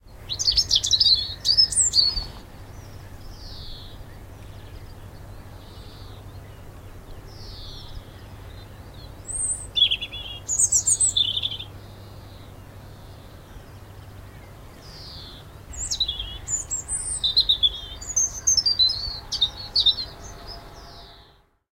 An English Robin singing at a local nature reserve 7th March 2007. A greenfinch can be heard in the background. Minidisc recording.